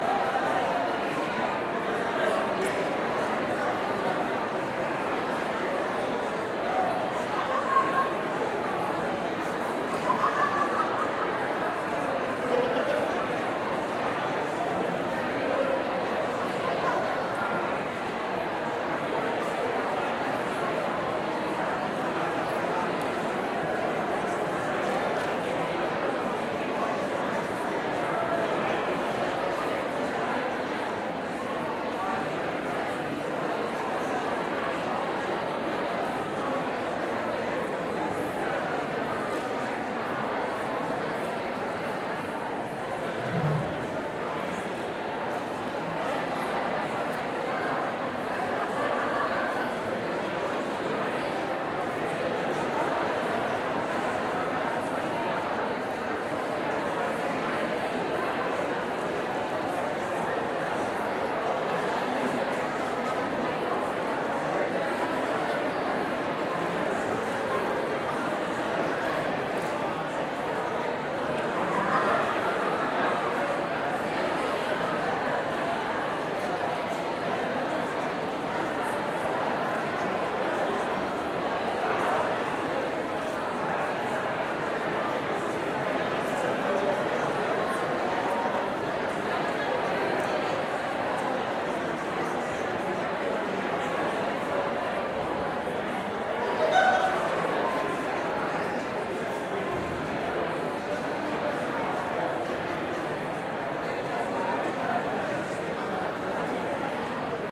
Medium Crowd Chatter
Gathering of a few hundred people. Ambient chatter and talking. Unintelligible.
event, large-crowd, medium, large-group, crowd, group, talking, hall, ambient, unintelligible, chatter